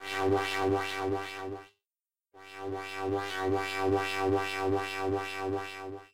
Retro Random Sound 06
electric wah wah wave with sounds in between.
Thank you for the effort.
old, electricity, original, 8bit, cool, computer, sample, woosh, effect, sound, retro, wave, tune, school